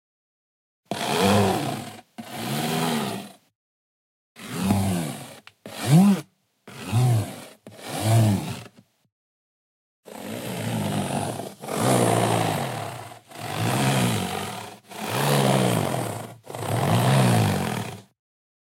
aerial ropeslide, slide, sliding, shrill, glide, dragging